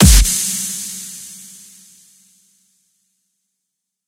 A fabulous snare. Made in FL Studio, used Grv snare clap 30 preset sample in FL with heavy reverb, lots of wet, some mastering and EQing, played at the same time as a few other snares.
dubstep, sample, snare